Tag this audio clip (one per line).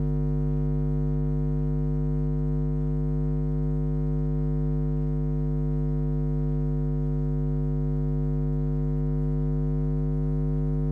electric-current
electricity
noise